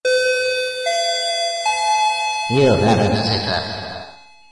This was a silly idea based on tone uploaded by FreqMan (thanks for the inspiration). The tones (airport lounge type)sound then a man's voice (much manipulated) says "You have a visitor". We used this in Regenis 4 Chronicles Chapter 7, where there's a funny scene. Thanks FreqMan.